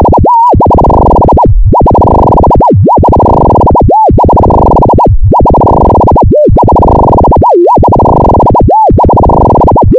05 Faux Scratch
Burbling noise loop that sounds a bit like a turntablist scratching.
audio-art, fm-synthesis, itp-2007, noise